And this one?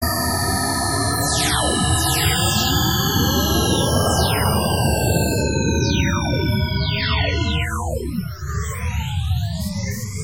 futuristic laser shutdown
A futuristic sound I made by taking a picture of my dog Cody’s cage and turning it into a spectrogram.
laser, futuristic, shutdown, spectrogram, future, spaceship, sci-fi